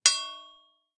anvil strike 5
The sound of what I imagine a hammer striking an anvil would probably make. This was created by hitting two knives together and resampling it for a lower pitch.
hit, hard, processed, strike, anvil